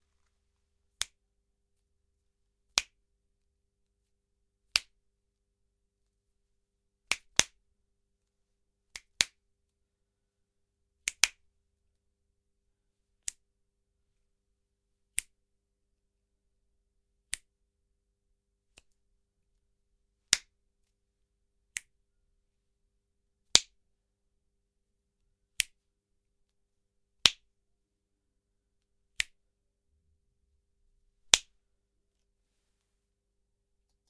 This sound, I created by snapping my fingers. repeatedly. Then I added a compressor in order to soften the sound , which makes it more subtle sounding. Because in reality when you switch on light it is a very gentle or soft sound.
light, Lights, a, Turning, off, switch